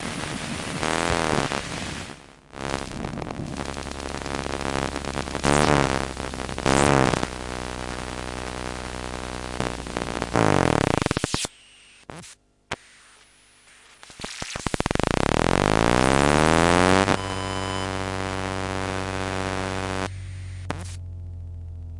Recordings made with my Zoom H2 and a Maplin Telephone Coil Pick-Up around 2008-2009. Some recorded at home and some at Stansted Airport.
bleep, coil, magnetic, buzz, pickup, electro, telephone, field-recording